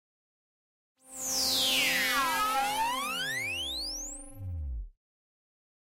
Time-Machine Down:Long
Edited, Free, Mastered